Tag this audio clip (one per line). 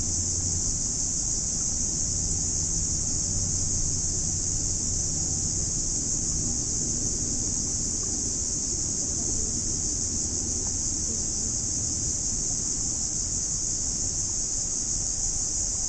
underwater
hydrophone
field-recording